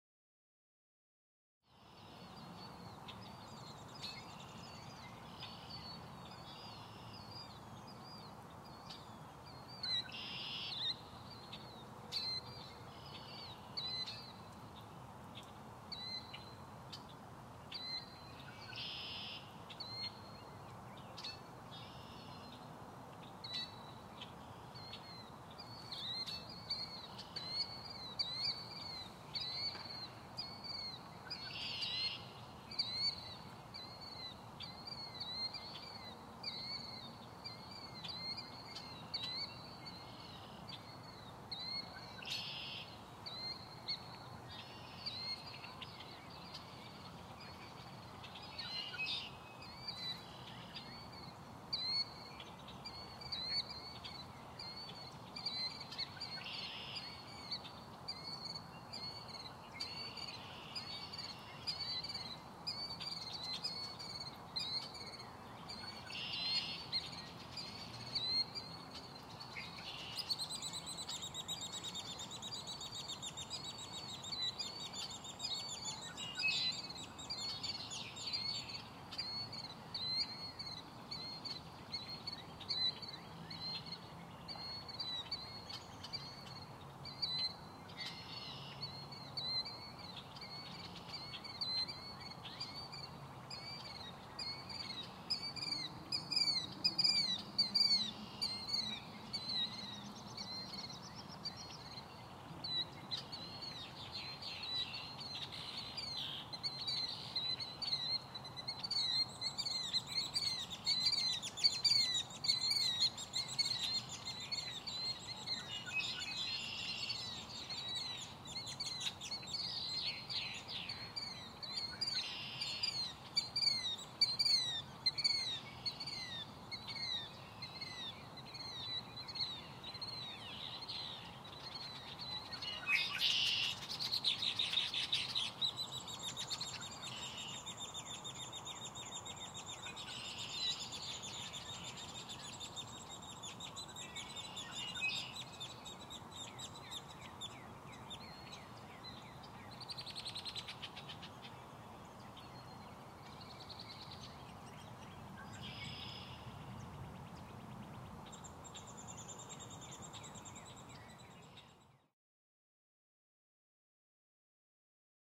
Killdear and Red-winged Blackbirds chirping
Killdears and Red-winged Blackbirds sing and chirp / chatter on the early spring evening. City noise in BG.
ambiance, bird, birds, birdsong, chirping, field-recording, nature, singing, songs